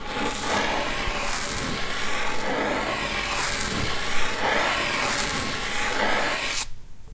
Recorded with H4n - Rotating two bits of smooth wood in a circular motion.